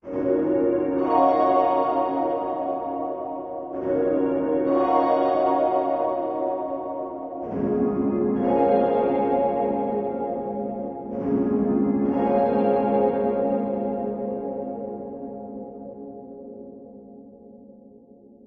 Ice Giant Sneezing Fit
A luscious pad/atmosphere perfect for use in soundtrack/scoring, chillwave, liquid funk, dnb, house/progressive, breakbeats, trance, rnb, indie, synthpop, electro, ambient, IDM, downtempo etc.
long, melodic, progressive, evolving, wide, pad, 130-bpm, luscious, effects, dreamy, liquid, soundscape, expansive, house, ambience, morphing, atmosphere, reverb, 130